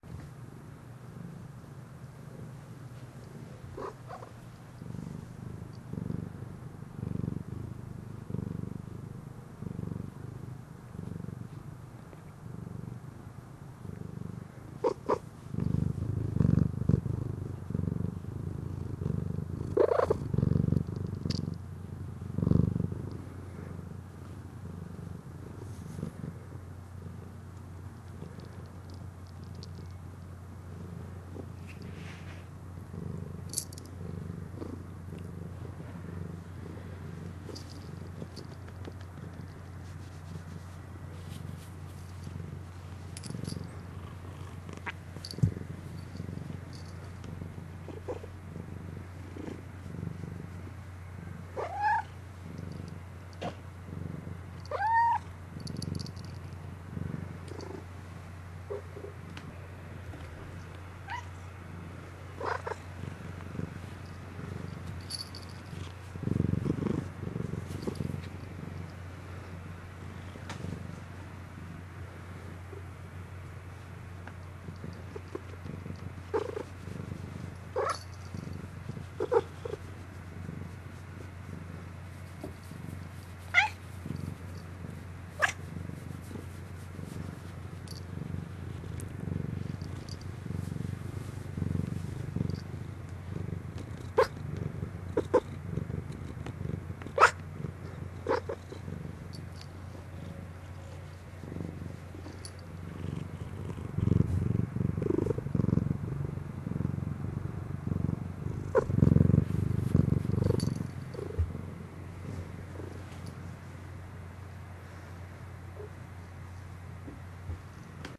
A short recording of my cat, Queen Felicia, purring and giving little happy chirps and a few short mews into the microphone.